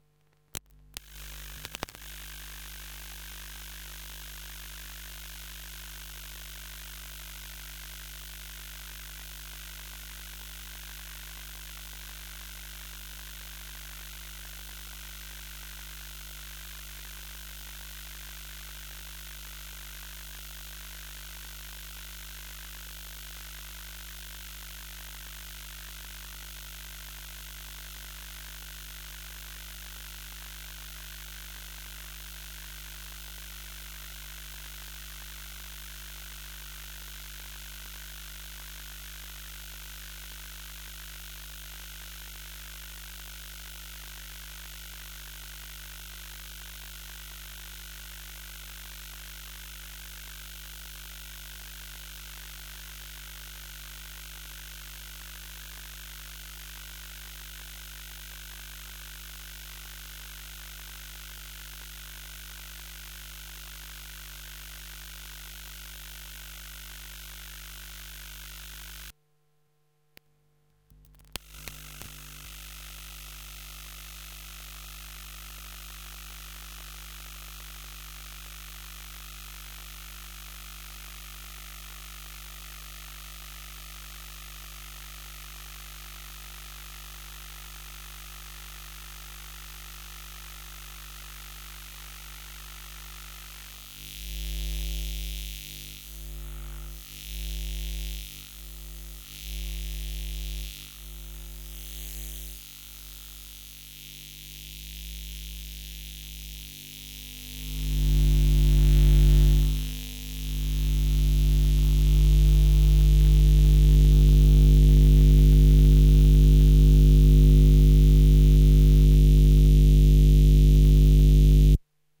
EMF fluorescent light hum more agressive with noise
This sound effect was recorded with high quality sound equipment and comes from a sound library called EMF which is pack of 216 high quality audio files with a total length of 378 minutes. In this library you'll find different sci-fi sound effects recorded with special microphones that changes electro-magnetic field into the sound.